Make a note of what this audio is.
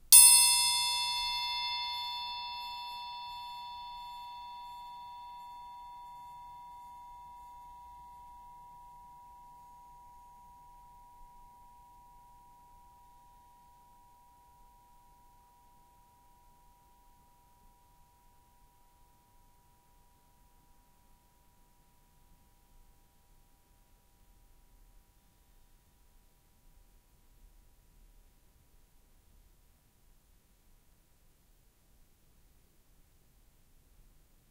Punch to music triangle.
Recorder: Tascam DR-40.
External mics.
Date: 2014-10-26.
triangle, punch, musical